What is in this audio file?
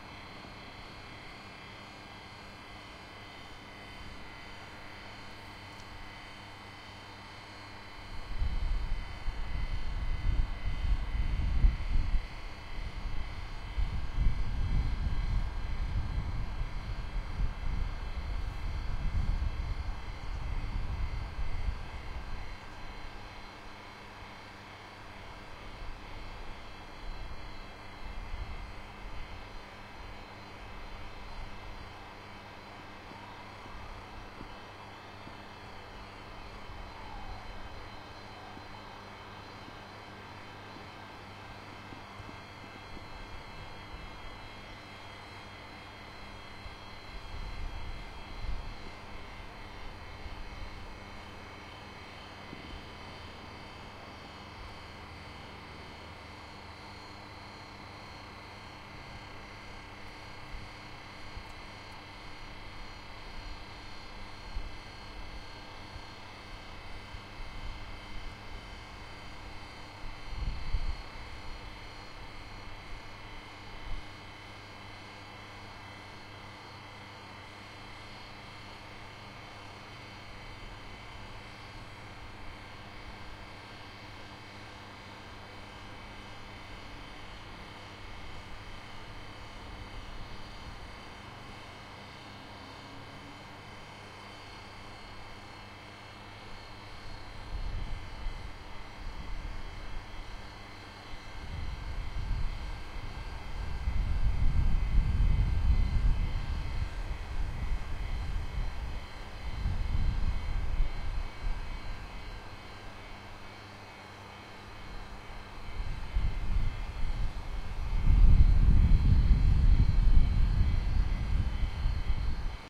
Birmingham-erdington-canal-transformer-2
Field recording of a large electricity distribution transformer next to a canal in Birmingham UK. There are various sound sources, and beats occur as the magneto-striction is phased by arrival time at the mic. This recording is from further away than the first one
Zoom H2 front mic wind shield some wind noise